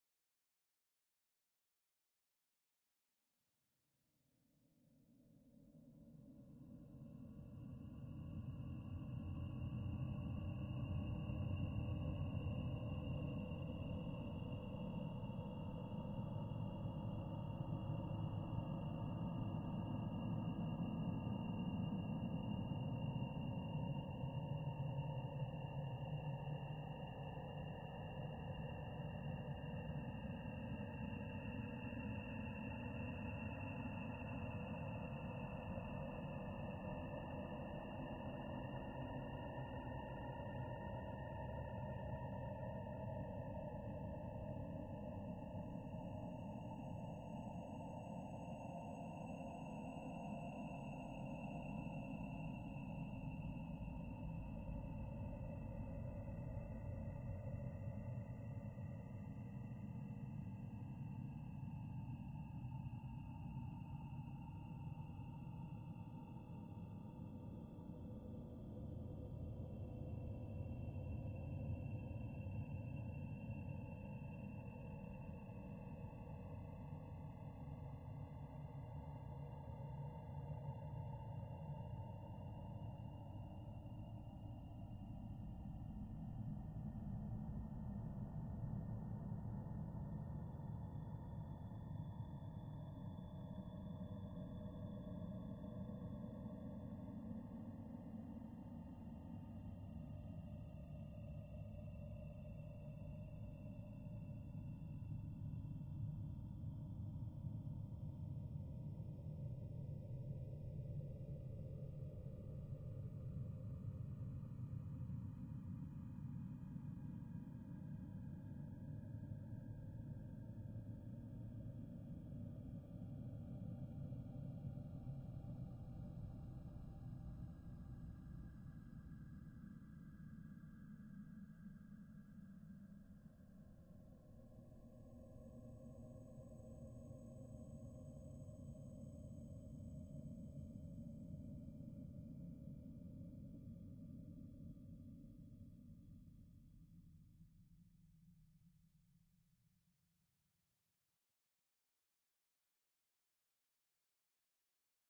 Spooky Ambiance #3
ambience, creepy, eerie, horror